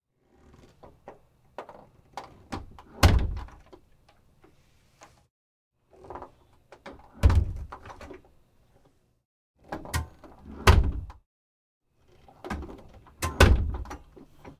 door wood int trailer rv deadbolt flimsy hollow roomy slow thump various
deadbolt, door, flimsy, hollow, int, roomy, rv, slow, thump, trailer, wood